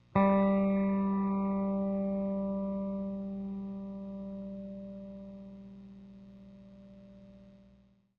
The G string of a Squire Jaguar guitar.